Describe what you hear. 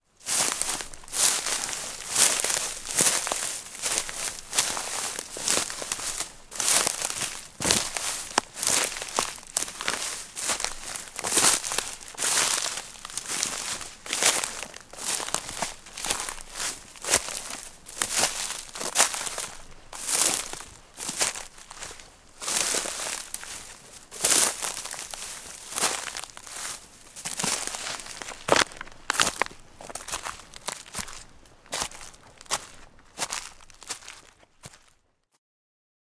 Footsteps Walking Boot Dry Leaves-Fern-Crunch
A selection of short walking boot sounds. Recorded with a Sennheiser MKH416 Shotgun microphone.